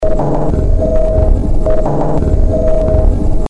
sound-design created to sound like a merry-go-round (no field recording
of a merry-go-round was used, though); made with Adobe Audition
1-bar, loop, processed, sound-design, murky, rhythmic, dark